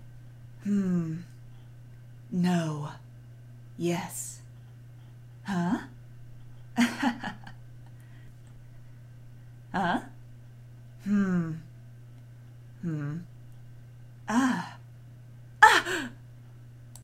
RPG sounds - the heroine of your party dialogue sounds.